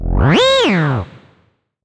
Moon Fauna - 57
Some synthetic animal vocalizations for you. Hop on your pitch bend wheel and make them even stranger. Distort them and freak out your neighbors.
animal, creature, fauna, sfx, sound-effect, synthetic